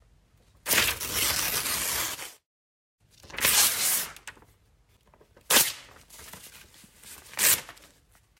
This sound has been recorded with a Tascam and was edited in post on Reaper. This is the sound of someone. tearing a piece of paper.